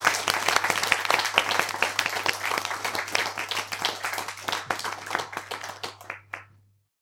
Applause Small Crowd 2
Cheers after a song at a small concert in Loophole club, Berlin, Germany. Recorded with a Zoom H2.
cheer, hand, clap, crowd